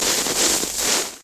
distorted crispy
Sounds recorded by participants of the April 2013 workshop at Les Corts secondary school, Barcelona. This is a foley workshop, where participants record, edit and apply sounds to silent animations.
Distorted and crispy sound.
crispy, distorted, foley, lescorts